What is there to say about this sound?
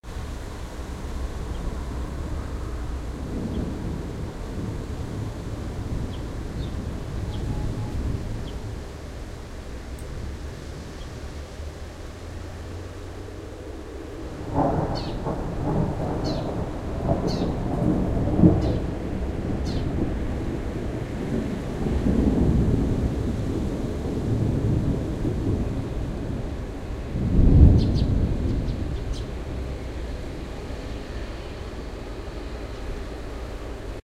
Recording of medium thunder with Rode NT4 - MixPreD - Tascam DR100mk3 setup.